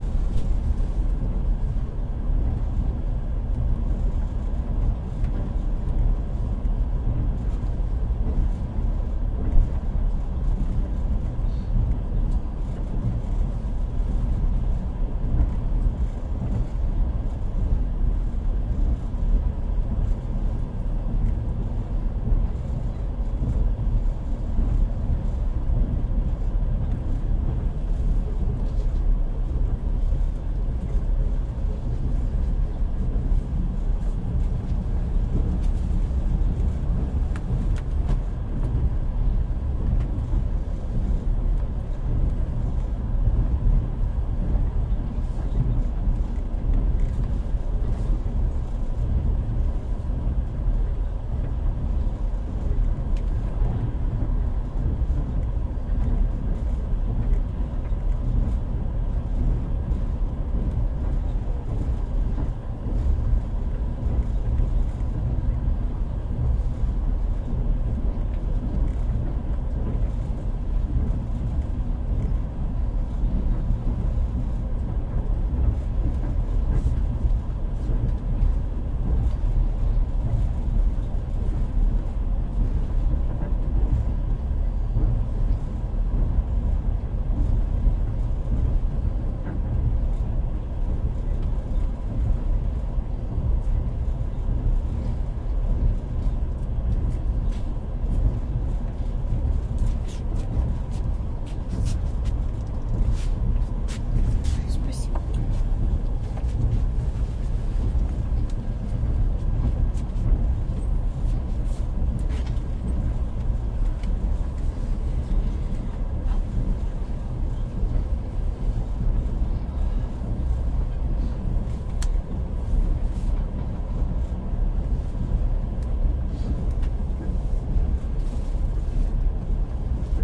Early morning in the passenger wagon.
Recorded 01-04-2013.
XY-stereo, Tascam DR-40, deadcat